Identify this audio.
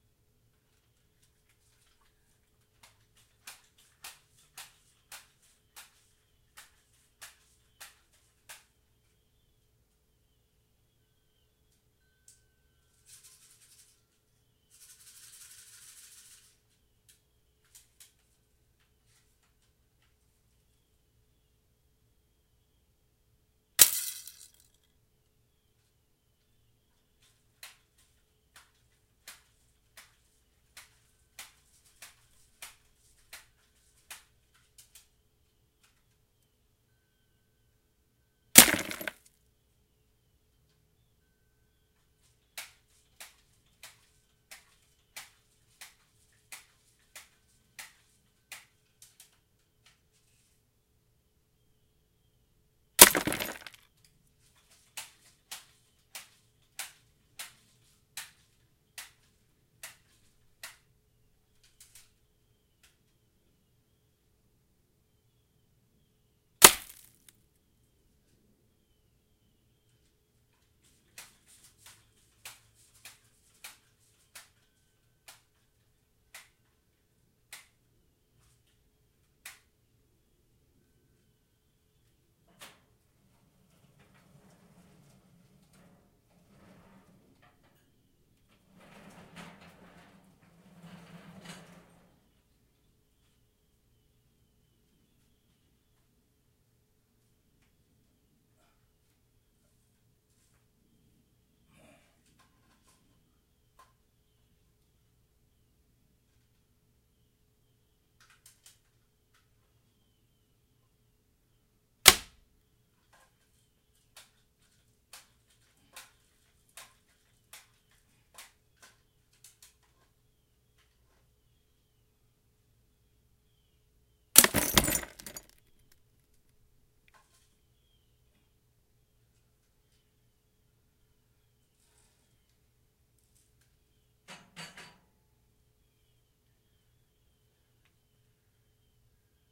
A larger glass bottle of approximately 1 quart closeup while being shot with bb's from 30 feet. I moved the propane grill midway between the microphone and myself and shot through the legs of the stand to baffle some of the gun percussive noise from the recording halfway through session. I did this to try and isolate more of the impact sounds on the bottle and for the added danger element.